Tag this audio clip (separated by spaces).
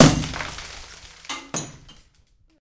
field-recording urban metallic high-quality metal city percussive percussion clean industrial